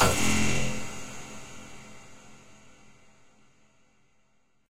Sci-Fi Impact
A futuristic impact sound. Could be used as a force field hit.
Hope you like it!
alien, boom, echo, force, force-field, future, fx, impact, reverb, robot, sci-fi, scifi, sfx, soundesign, space, spaceship, sudden